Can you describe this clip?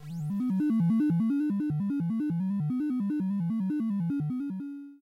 Retro Melodic Tune 14 Sound
glitchy sound in the beginning, but i think it will be useful for other purposes so i let it in for projects.
Thank you for the effort.
tune, school, sound, original, 8bit, cool, loop, computer, retro, sample, melodic, old, effect, game